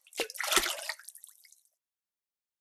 Water pouring 4
pouring, splash, Water, water-drops